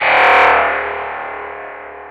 Dark Electric
Unique sound made in FL Studio!!!!!!!